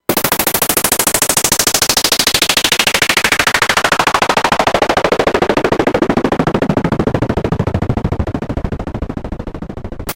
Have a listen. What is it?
Sub 37 PercSpiral
One of the fun moments in adjusting LFO, amounts and such on Baby Moogy 37
Analog, Electronic, Percussive, Crunchy, Raw